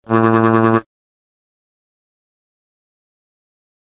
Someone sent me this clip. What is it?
Typing Scroll Typewriter
A sound suitable for subtitles, titles and typewriter-esque visuals. VERY SIMILAR TO THE GAME UNDERTALE; be careful with this sample if using commercially. Sound made using Native Instruments Kontakt.
reading, scrolling, story, storyline, subtitle, text, typewriter, Typing